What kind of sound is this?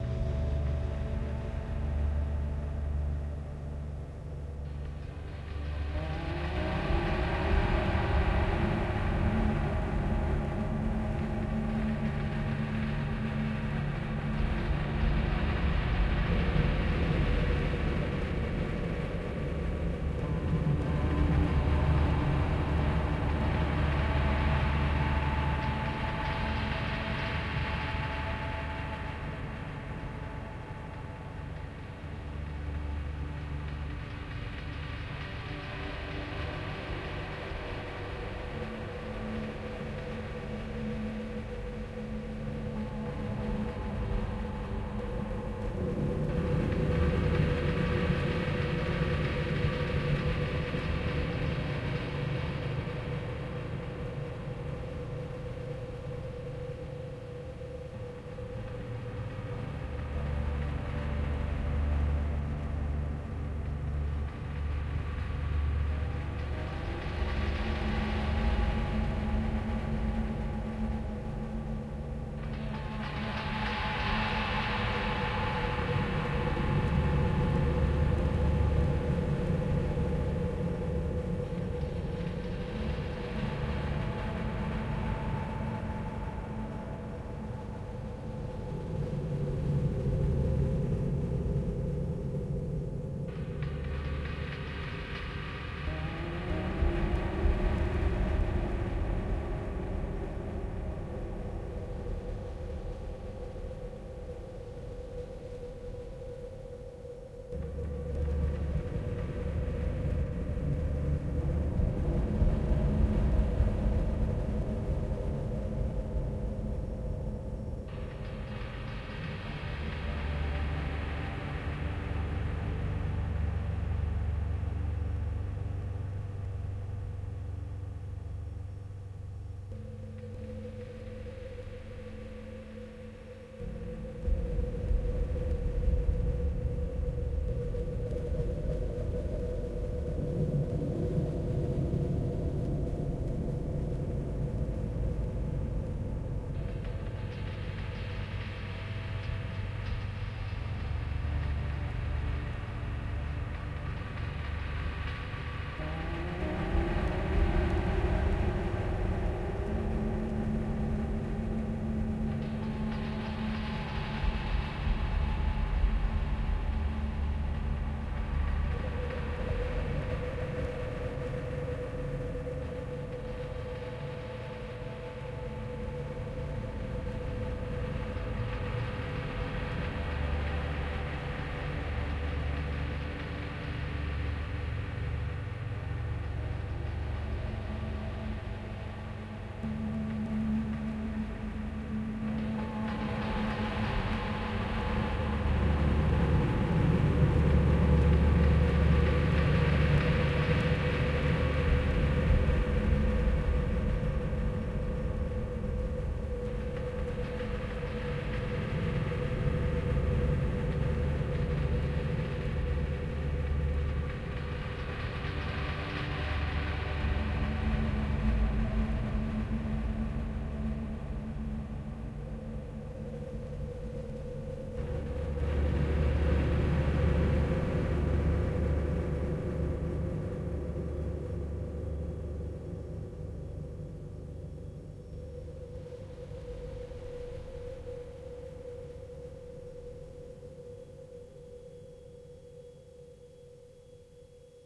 8tr Tape Sounds.
scientific, pluralistic, tape, futuristic, artistic, magical